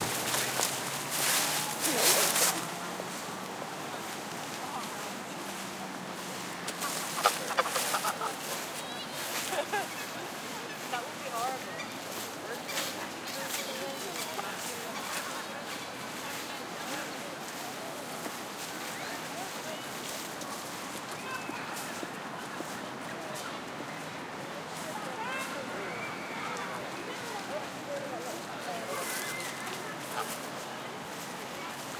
Park ambiance
This was recorded in a park in Westminster London
London, Countryside, Park, Public, Field-Recording, Duck, Ducks, Country, Ambiance, Pond, Atmosphere, Lake, Birds